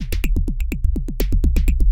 Rhythmmakerloop 125 bpm-34
This is a pure electro drumloop at 125 bpm
and 1 measure 4/4 long. With some low frequency toms, almost resembling
a bass sound, adding a nice groove. It is part of the "Rhythmmaker pack
125 bpm" sample pack and was created using the Rhythmmaker ensemble within Native Instruments Reaktor. Mastering (EQ, Stereo Enhancer, Multi-Band expand/compress/limit, dither, fades at start and/or end) done within Wavelab.